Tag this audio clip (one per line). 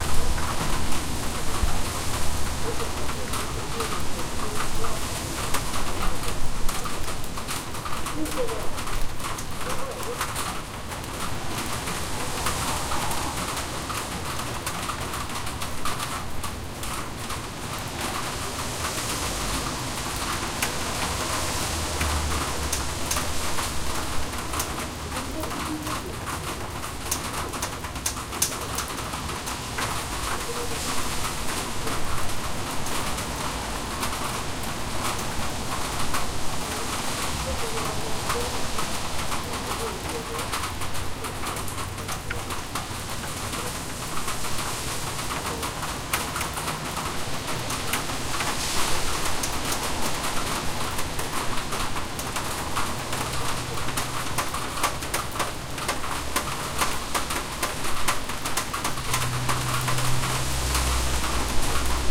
ambiance
ambience
ambient
atmosphere
cars
city
field-recording
noise
rain
soundscape